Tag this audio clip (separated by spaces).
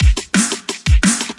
drumnbass heavy